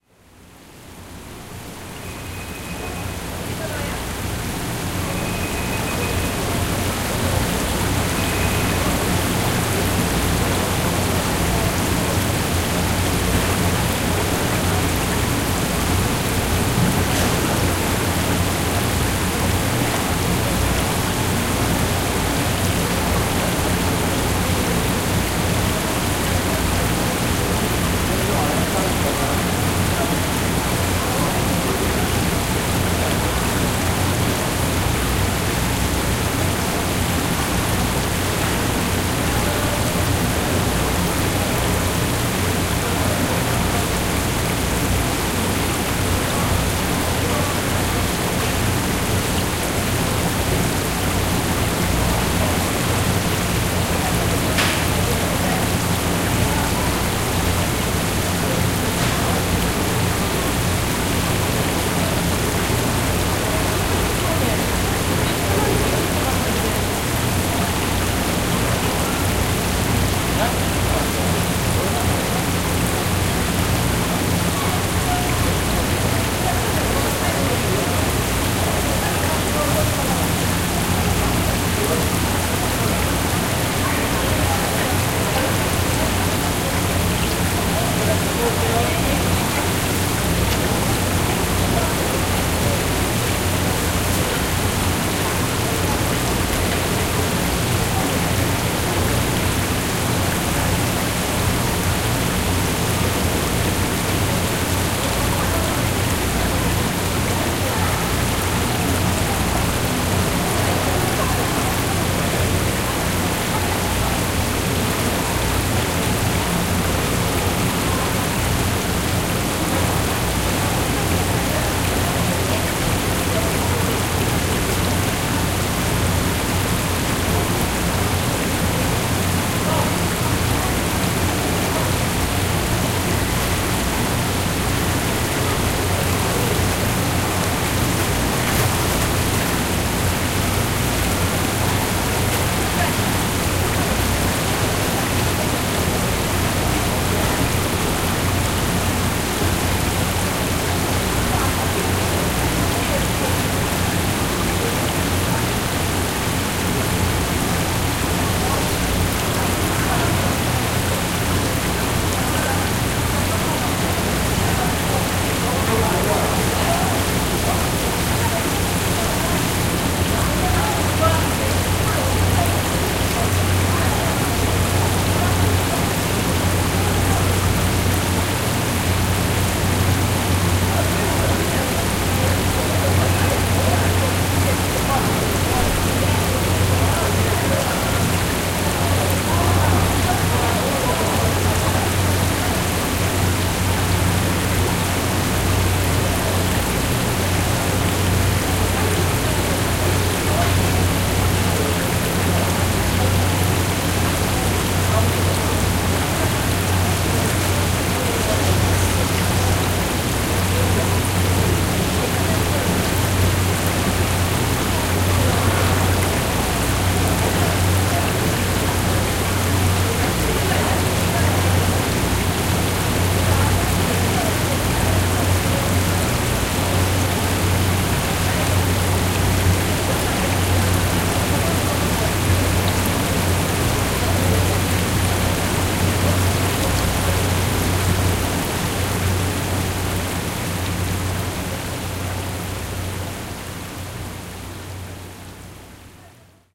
0374 Fish market 2
Fish tank and people talking in the background at Noryangjin Fish Market.
20120718